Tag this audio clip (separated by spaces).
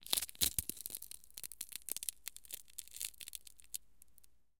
break crack crunch crush egg egg-shell foley shell stereo wide